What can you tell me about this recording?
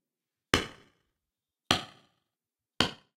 Hammering nails into wall sound.
bum, nail, hammer